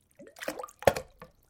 Object falls into a plastic bucket filled with water. Classic attack and release gesture.
Object valt in een plastic emmer die gevult is met water. Simpel attack geluid ontstaat.
Recorded using Sennheiser MKH-60 & Sound Devices 722
drip, emmer, plons, plunge